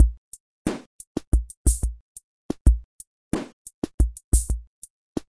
minipops 90bpm
A two bar drum loop using samples taken from a mix of very cheap drum machines. Created in Reason 1.
cocktail, drums, fuzzy, loop